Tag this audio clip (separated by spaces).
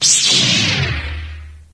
lightsaber star wars